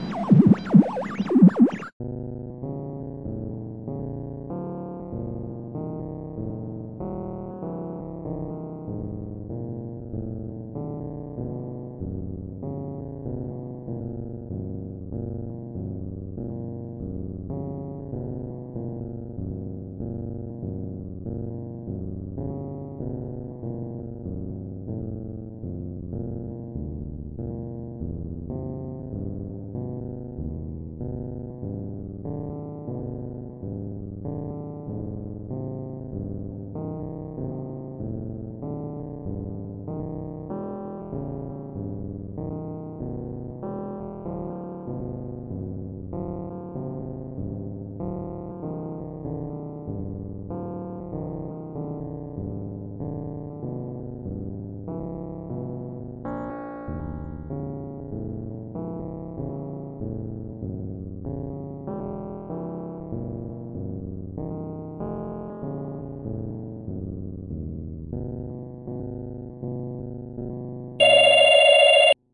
Brother Isnt Home
A creepy, eiree, mysterious track I made a little while back.